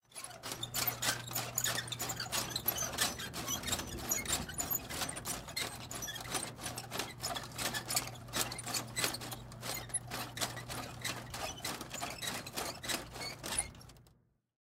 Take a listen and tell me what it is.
Plastic Forks Rub
Plastic wear rubbing together